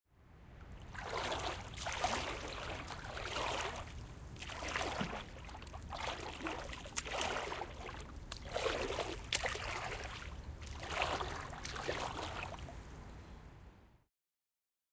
pool sound 1
Splashing in pool. Sony ECM-99 stereo microphone to SonyMD (MZ-N707)